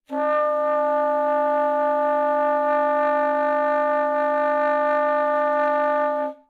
One of several multiphonic sounds from the alto sax of Howie Smith.
sax, howie, smith, multiphonic